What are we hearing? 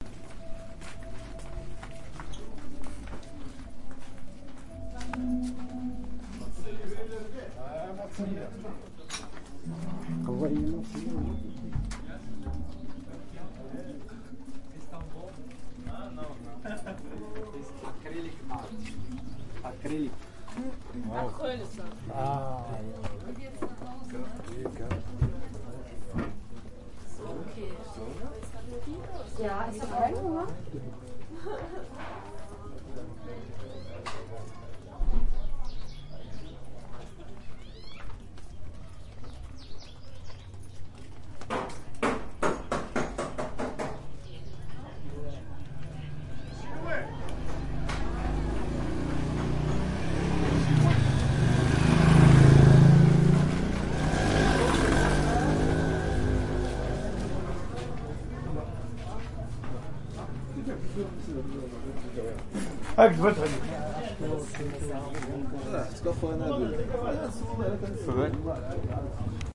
Marrakesh Street Ambience 3
Street ambience in Marrakesh
ambiance, ambience, ambient, city, field-recording, general-noise, marrakech, marrakesh, morocco, soundscape